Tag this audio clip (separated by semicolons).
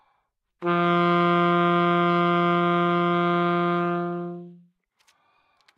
alto; good-sounds